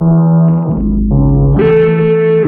Horn Alarm
pad, sound